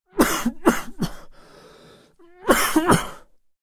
Content warning
I cough, but I am not sick. I had to force myself to cough to record this sound. Recorded using Taskam DR-05
coronavirus, Cough